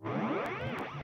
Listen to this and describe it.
How about some clicky/minimalist/glitch percussion?
Made from clips and processed recordings from one of my experimental sessions with amp-sims (VST-amps).
See this pack which contains unprocessed samples from that recording session:
amp-modelling, amp-VST, click, minimal, minimalist, percussion, processed, Revalver-III, sound-design, virtual-amp